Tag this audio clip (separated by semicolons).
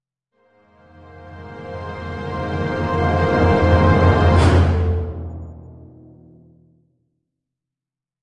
Cluster; Suspense; Orchestral